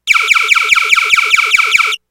Gun Shoot 5
"Gun Sound" made with Korg Electribe recorded to Audacity.